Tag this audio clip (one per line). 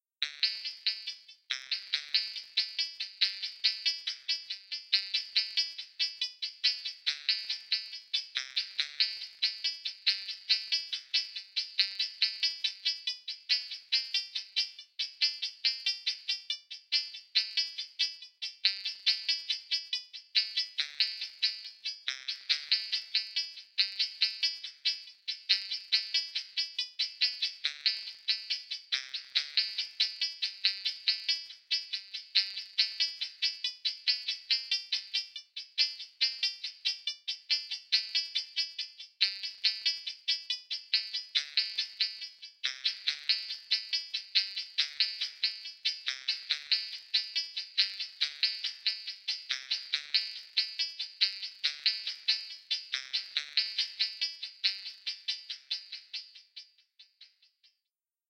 synth
techno
elektro
electro
ambient
bpm-140
new
modern